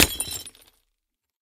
LIGHTBULB SMASH 004
This was the smashing of an indoor flood light bulb. Lights smashed by Lloyd Jackson, recorded by Brady Leduc at Pulsworks Audio Arts. Recorded with an ATM250 mic through an NPNG preamp and an Amek Einstein console into pro tools.
crush, impact, hit, light, crash, crunch, break, glass, shatter, light-bulb, tinkle, bulb, smash, lamp, fragments